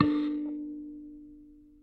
96kElectricKalimba - K5clean
Tones from a small electric kalimba (thumb-piano) played with healthy distortion through a miniature amplifier.